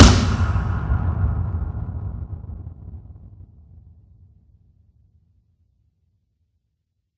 A processed sound of my house, sounds like a snare, but is only a item crashed onto the ground.